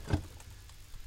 this sound is made using something in my kitchen, one way or another
percussion, hit